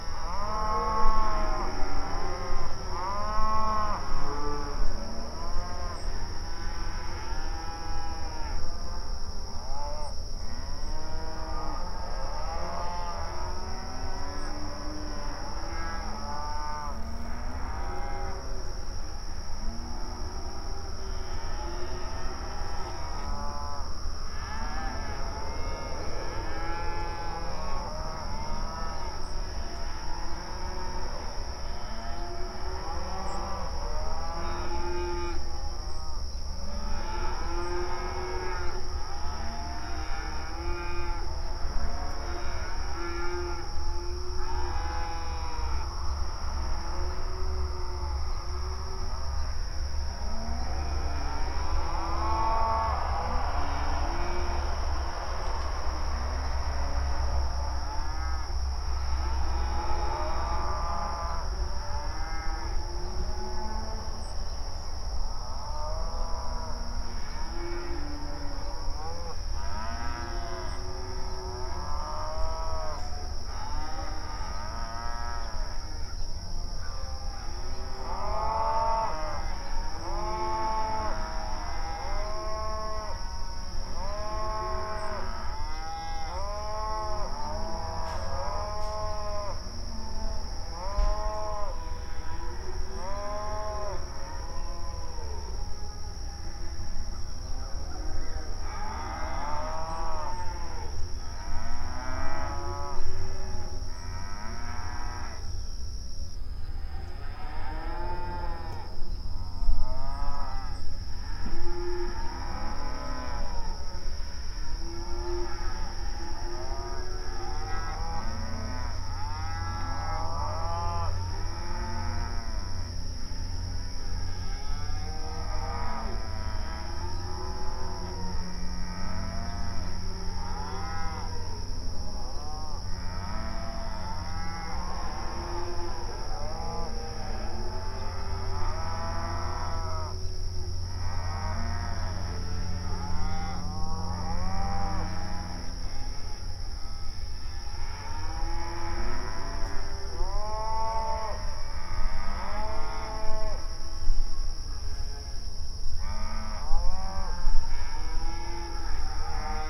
Mournful cows mooing in the night. These are my neighbor's pasture-raised cattle and they have a pretty good life but about this time every year he has to wean the calves. He separates the calves from their mothers. They are on opposite sides of a fence. The fence is a single electric wire. The mothers and their calves are inches from each other but not in the same field. It makes me so sad to hear them in such distress but they seem to get over it in a few days.